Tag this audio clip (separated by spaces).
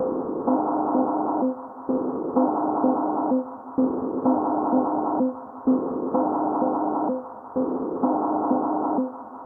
reverb fx heroic filter slow improvised trailer soundtrack new sad pathos tragedy sountracks quantized